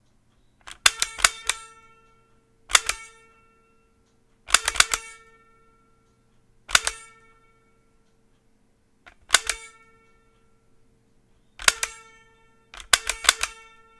Zapper Trigger
This is the sound of the the NES light zapper's trigger.
clicks, loaded, nes, nintendo, spring, trigger, videogame, zapper